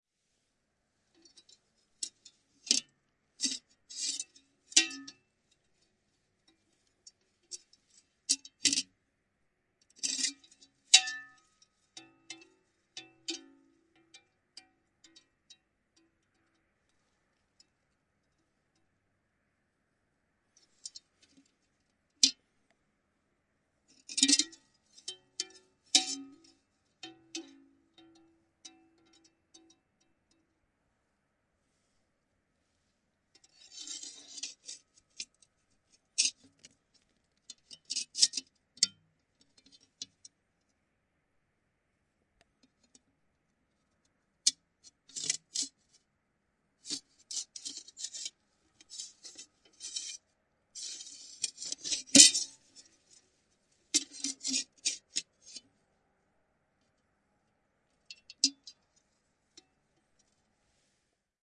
fixing a metal plate
mounting a metal plate and slide the plate on the concrete surface. some cool metal sounds after losing its footing.